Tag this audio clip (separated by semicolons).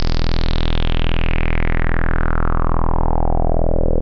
multisample; square; synth